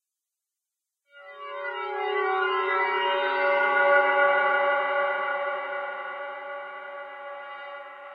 artificial synthetic sound
A sci-fi sound created with a free vst instrument. It's good for "alien" type atmospheres or intros.
alien, artificial, effects, fx, sci-fi, sound, synth, synthetic